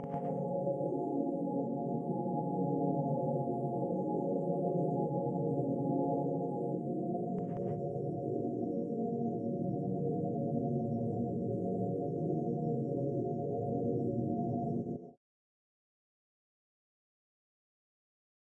KFA6 130BPM
A collection of pads and atmospheres created with an H4N Zoom Recorder and Ableton Live